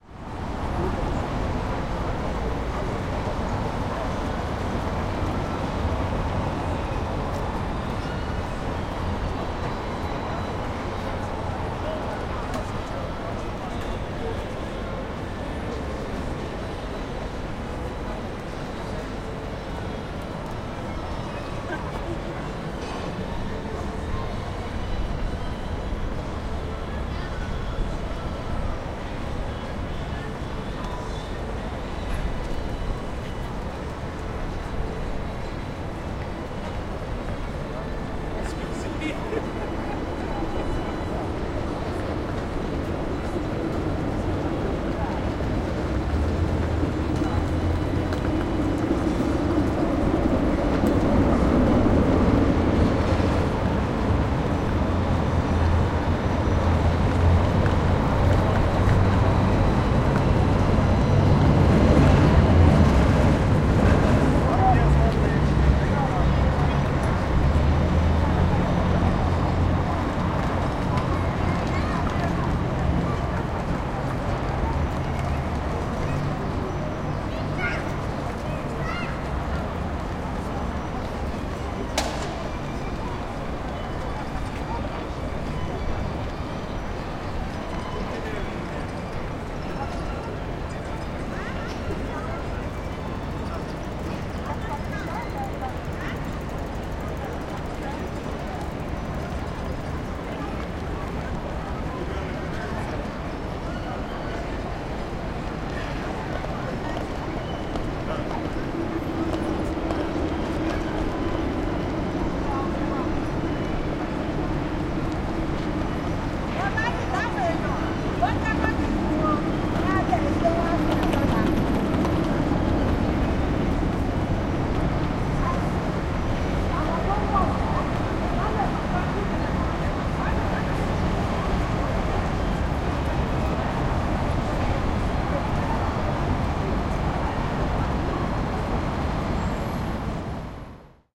Katuhäly, aukio / Street in the city, square in the center of Helsinki, people, trams, traffic
Kolmen Sepän aukio Helsingissä 2000-luvulla. Monikielistä, etäistä puheensorinaa, askeleita, raitiovaunuja. Taustalla autoliikennettä ja vaimeaa musiikkia.
Äänitetty / Rec: Zoom H2, internal mic
Paikka/Place: Suomi / Finland / Helsinki
Aika/Date: 30.07.2008
Katu, Soundfx, Finnish-Broadcasting-Company, Street, Tram, Finland, City, Field-Recording, Suomi, Yle, Traffic, Kaupunki, Yleisradio, Tehosteet